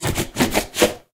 Stomping & scratching with shoes